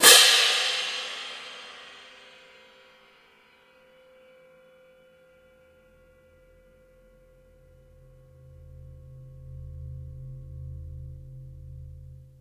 concert crash cymbals
concert, drums, percussive, crashes, cymbal, cymbals, orchestra, drum, orchestral, percussion, crash